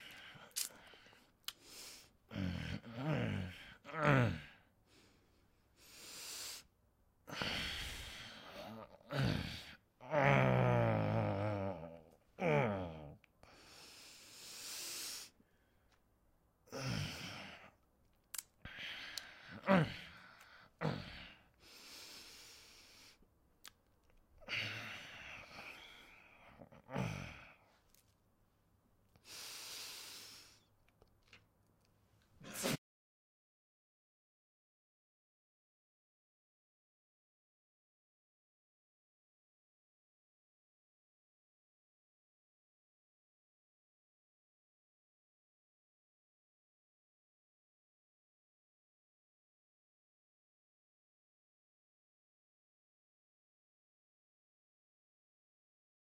grumbling from sleeping
hard, sleeping, sounds, OWI